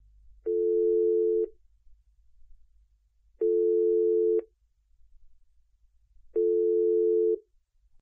An office telephone tone 3 times.